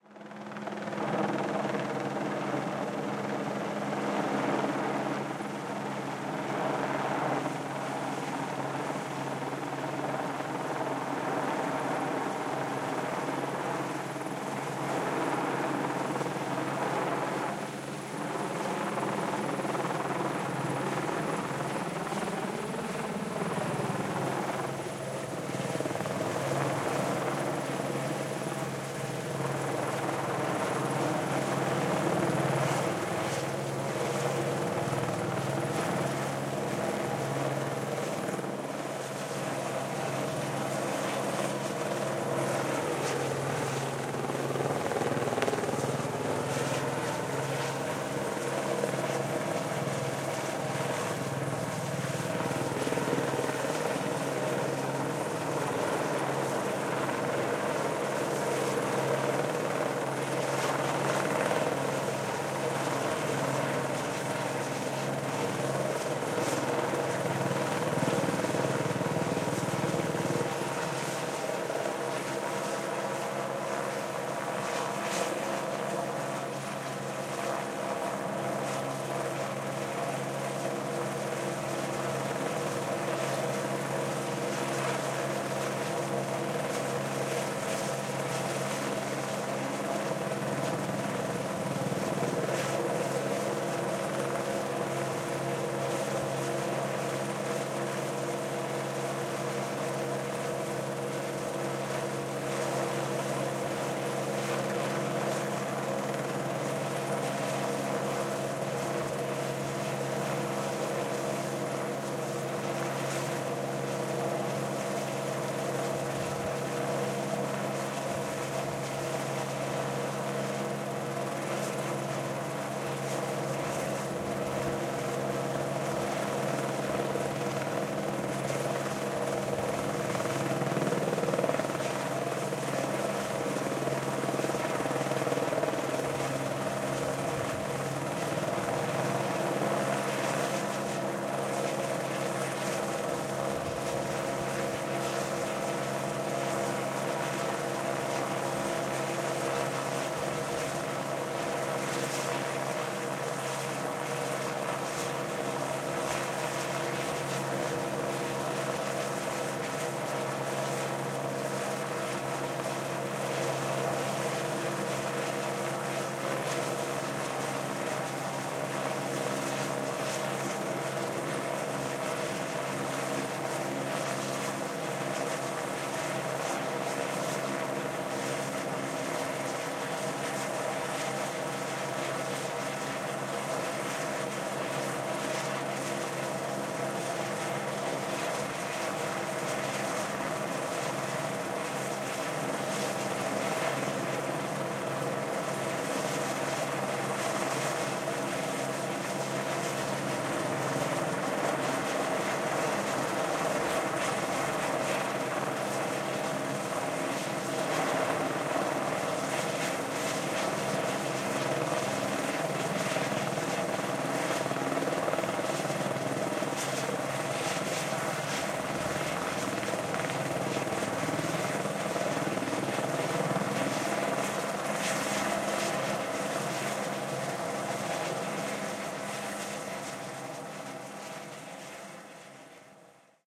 Helicopter Hover - - Output - Stereo Out
Air, Flying, Helicopter, Hover, Machines